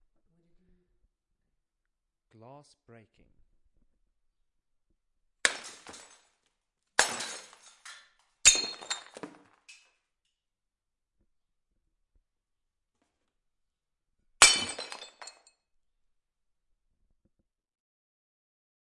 Glass and ceramic plates being thrown to the floor and shattering.